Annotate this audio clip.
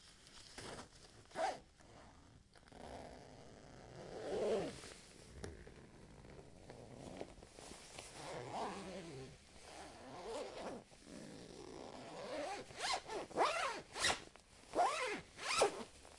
Just a little zipper collection.